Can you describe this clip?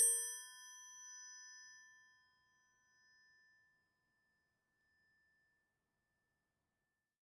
Softer wrench hit A#3
Recorded with DPA 4021.
A chrome wrench/spanner tuned to a A#3.